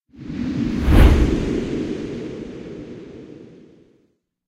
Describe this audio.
Whoosh Compressed
flyby, passby, swoosh, whoosh